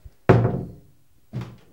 Door - Close 04
A door close
close, closing, door, doors, open, opening